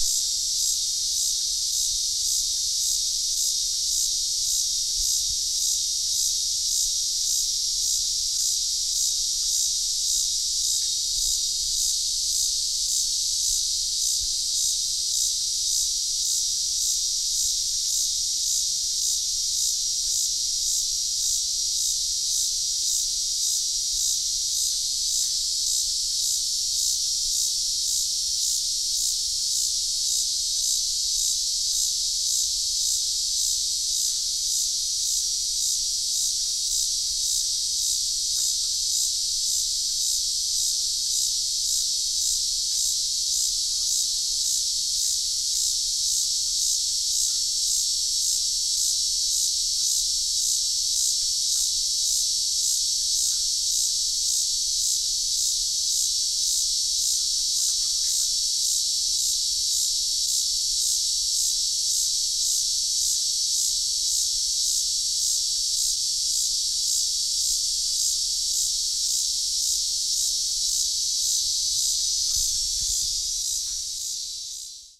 Close recording of what I believe to be cicada song. Unfortunately, there is a faint metallic sound in the background, perhaps a loose tin roof on one of the nearby farm structures rustling in the wind.
Recorded using: Sony MZ-R700 MiniDisc Recorder, Sony ECM-MS907 Electret Condenser.
cicadas, field-recording, insects, nature, new-mexico, timbals